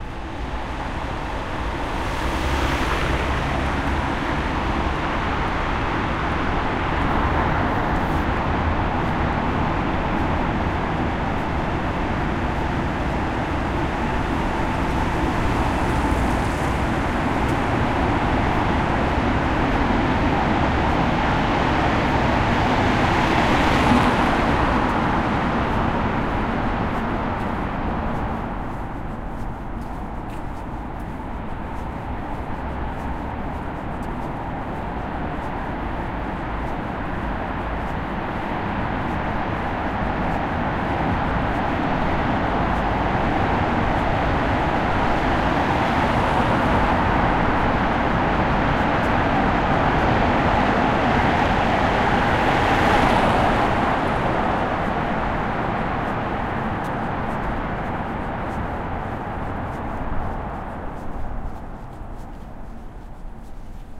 STE-041 walking through tunnel
Walking through a large tunnel while cars drive through.
car, drive, reverberation, city, reverb, echo, tunnel